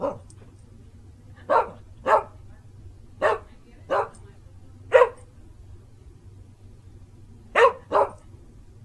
mac3clean
Entire sequence of barks from this sample pack recorded in the doorway to my balcony with an extension cable direct to my PC with clip on condenser microphone.
animal, dog, panting, pet